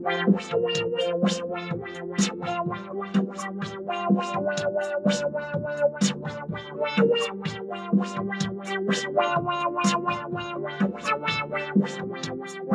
wahwah, hard limited